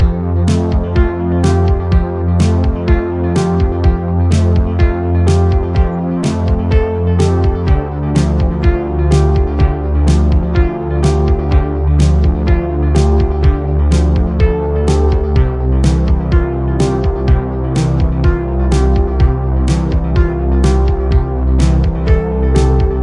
neon goodbye loop
this is a short little loop i made while experimenting with a new synth i got, the arturia microfreak. it's a nostalgic sounding, kinda cheerful, but also sounds a little bit like a goodbye. it should be a seamless loop, and is in c major.
125-bpm, electronic, loop, music, nostalgic, synth, vaporwave